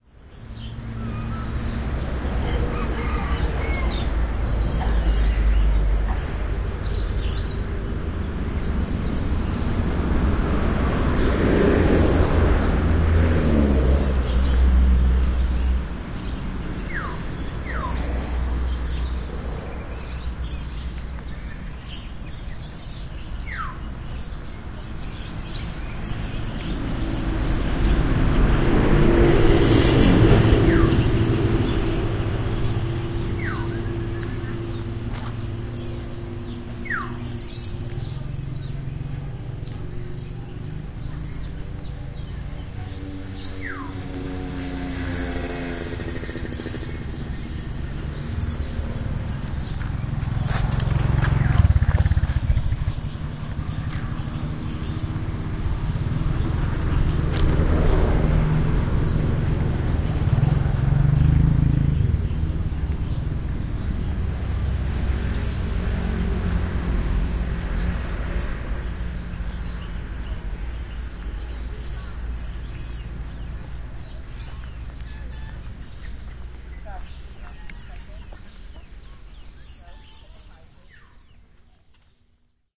Birds fair outside of Jogjakarta. Java, Indonesia.
- Recorded with iPod with iTalk internal mic.